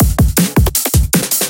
Drum D'n'B FBB Line 02
Acoustic, B, Break, Breakbeat, D, DnB, Drum, Drum-and-Bass, Drums, Heavy, loop, n